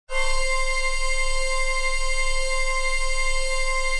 This was also made by adding a bunch of reverb to "Synth Lead 1".